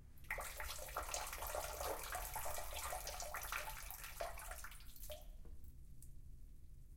male peeing
recording of peeing.
bath, bathroom, WC, pee, campus-upf, male, piss, water, toilet, UPF-CS14